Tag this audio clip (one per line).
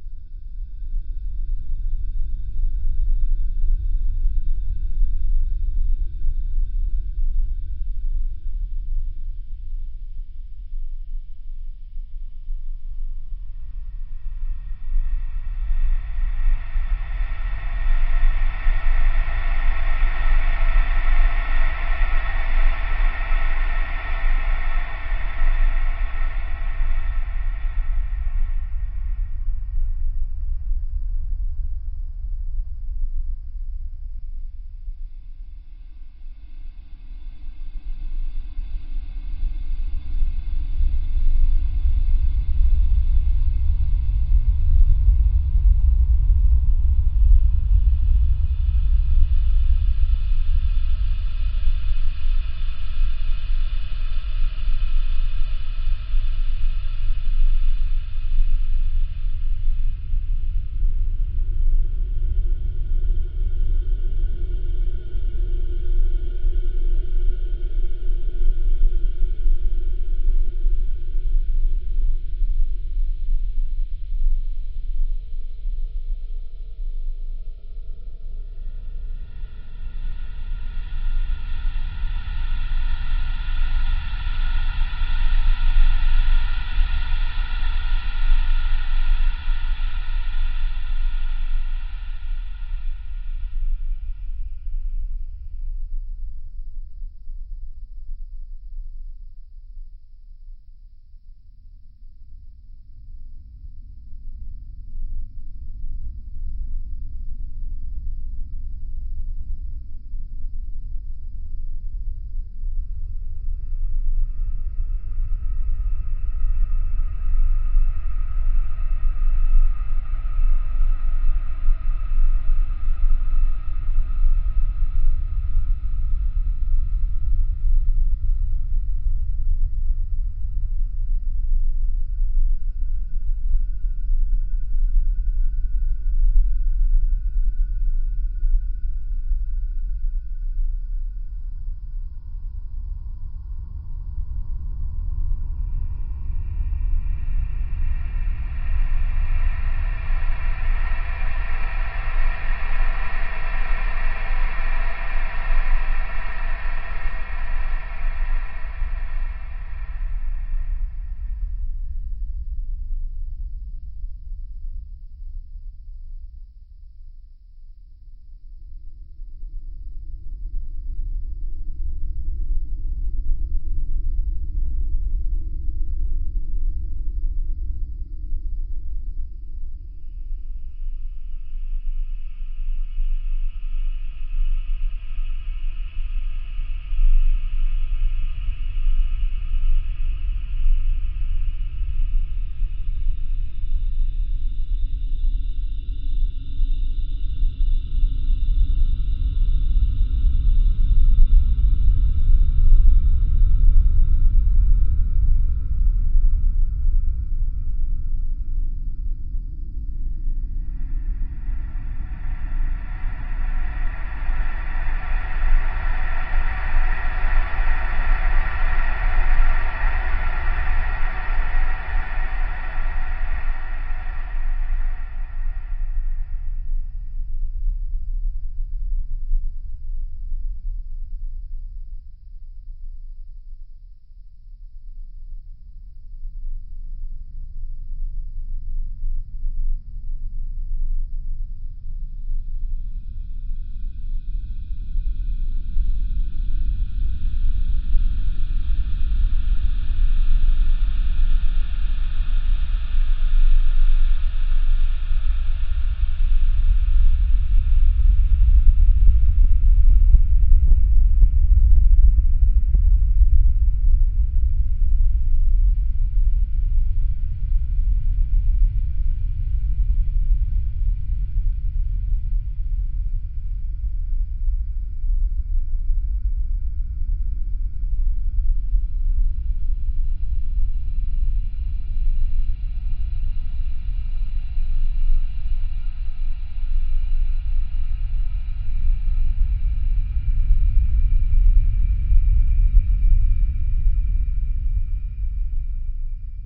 low-pitch
sinister
soundscape
ambient
white-noise
spooky
scary
background-sound
loop
atmosphere
long
horror
terrifying
background
deep
haunted
terror
atmos
ambience
noise
eerie
ominous
ambiance
loopable
creepy
atmospheric